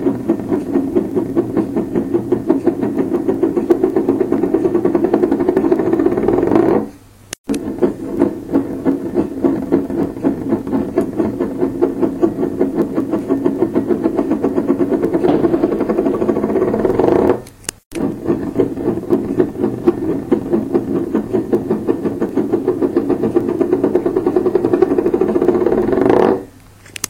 puodel daug
tea mug spinning
mug, spinning, tea